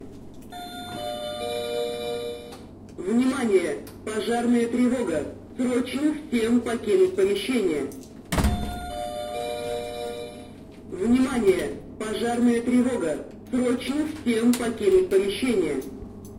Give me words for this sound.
At 10 pm we were (I'm and my colleague) about to leave office. Suddenly triggered the fire alarm. My colleague closes the office door. This is false fire alarm, no any fire or smoke are found.
Recorded: 2012-10-22.